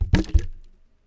water bottle snare 31 (gurgle)
Mono recording of a water bottle hit against a chair. Sounds something like a snare. Condenser mic. Gurgle type sound.
drums, percussion, snares, studio, water-bottle